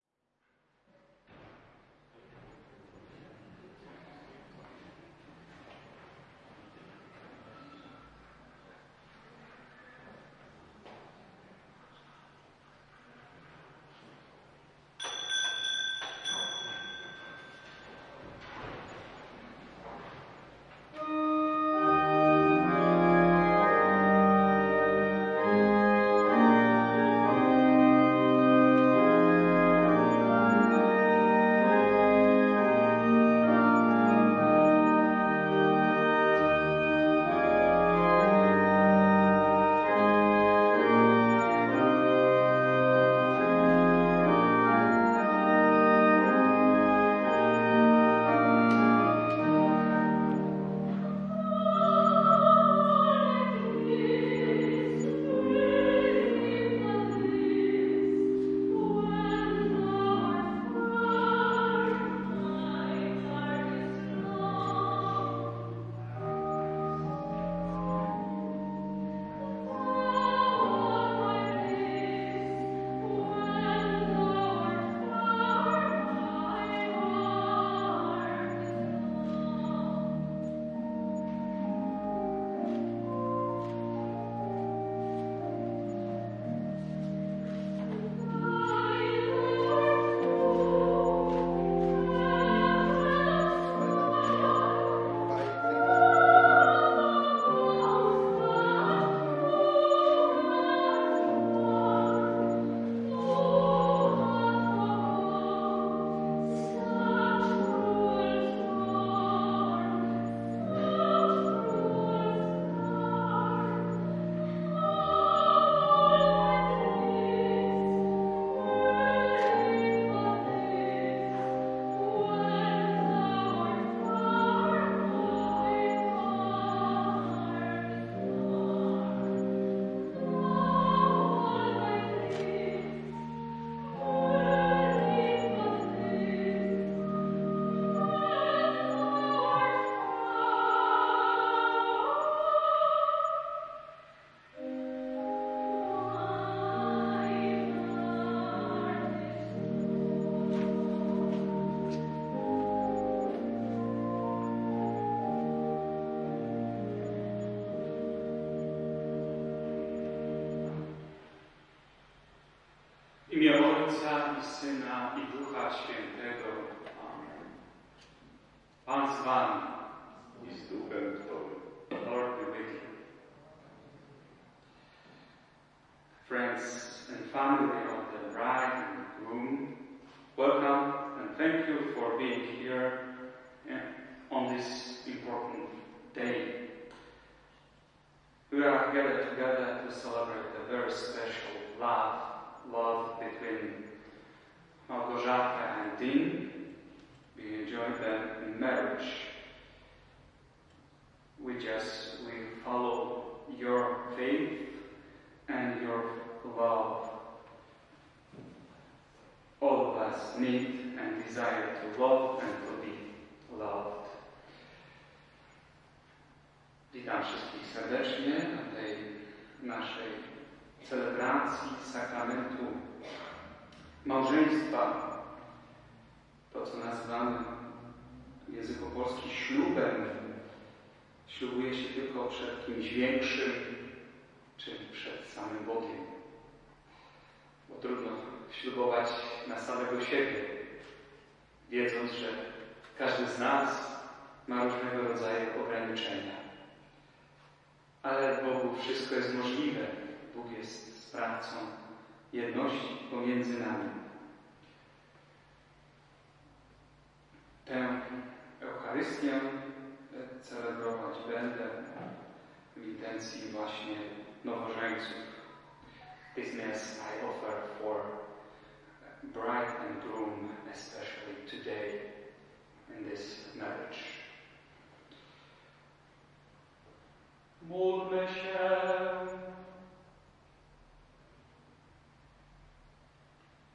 siosio&dean wedding 001 23.06.18
23.06.2018: 2 p.m. Irish-Polish church wedding in The Sacred Heart of Jesus Church in Jelenia Gora-Sobieszow (Poland). The beginning of the ceremony/enter of bride and groom. No processing. recorder Marantz PMD661mKII + shure vp88
pipe-organ, Jelenia-Gora, Poland